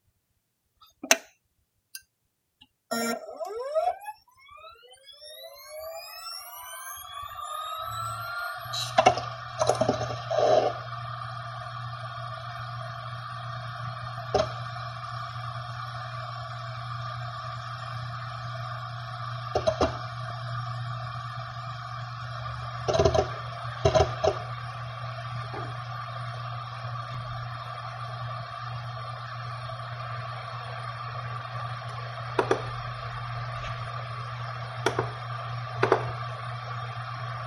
G-RAID Power on
My G-RAID external hard drive turns on.
I recorded this with my Logitech Webcam Pro 9000 in Audacity.
Note: The hard drive isn't as laud as the recording is. I amplified it a lot.
drive, G-RAID, hard